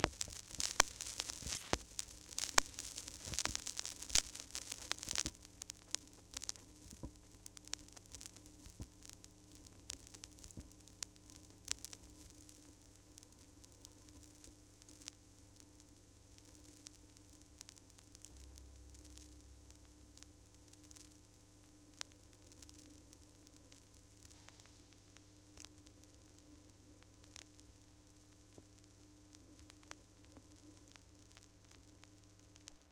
Vinyl Hiss, Cracks, and Pops 1
Authentic vinyl noise taken from silence between tracks off an old LP.
Crack, Analog, Noise, Pop, Vinyl, Hiss, Distortion